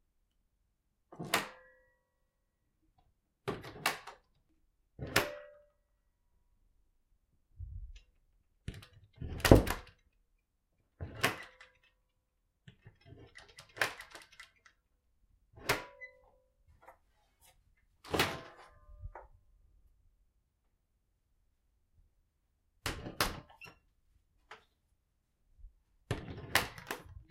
door open close spring latch squeak
The opening and closing of each door sounds similar, but there's a slight difference... when the door is opened, you can sense the most mild of dramatic tension in that spring, as if it just wants to be left alone, and it complains loudly in the form of shaking metal. And when the door is closed, the latch slides happily into the recess that holds it closed... it's so happy to have regained its privacy and be at rest once again.